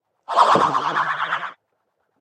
Crazy Bat
Weird bat or another strange small animal.
horror, cartoon, bat, noise, crazy, fiction, Halloween